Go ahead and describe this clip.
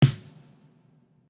Fat Vintage Snare
This is the aggregate recording of three different mics on a vintage Ludwig snare. Shure Sm57 on top, Royer 121 on bottom, mixed with a mono Neumann U47 overhead set up. Recorded beautifully on an API: Legacy Plus Console at a studio in Boston.